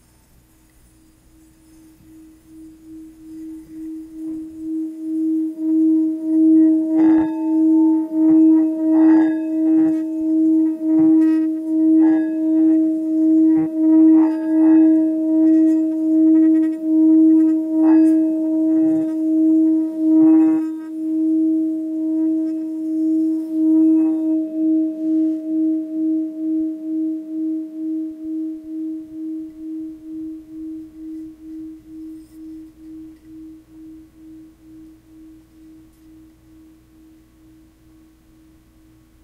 Making my singing bowl sing
singing, bell, tibetan, sing, ringong